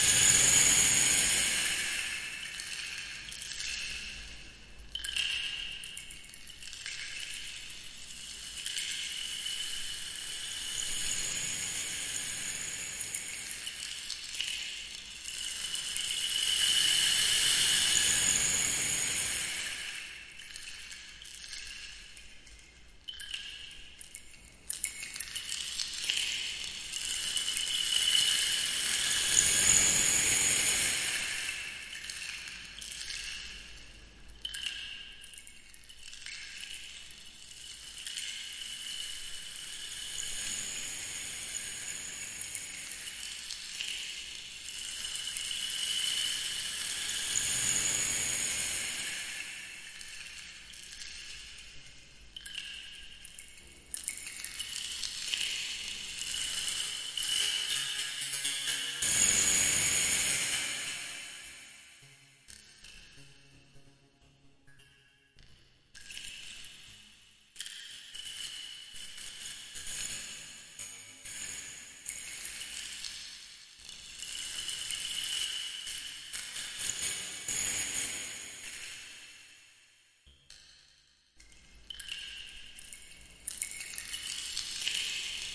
ciao,
This is a RainStick, effected with granular synthesis.
bye
F.